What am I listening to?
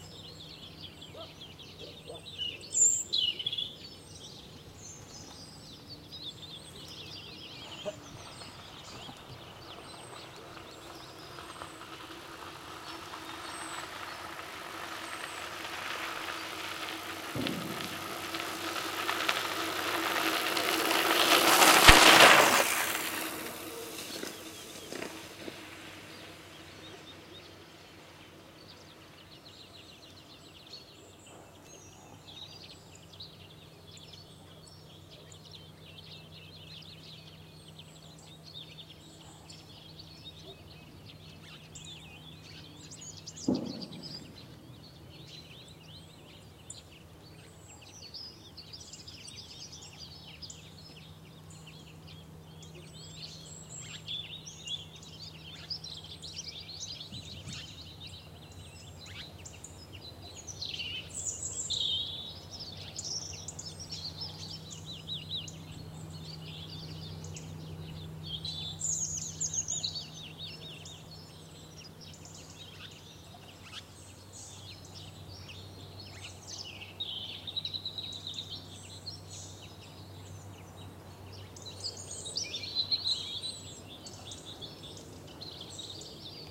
20061030.pinewood.cyclist
a cyclist on a dirt road passes through the peaceful forest. Then birds singing and gun shots. sennheiser me66 > shure fp24 > iriver h120 / un ciclista pasa por una pista forestal
ambiance, autumn, bicycle, birds, field-recording, forest, nature, offroad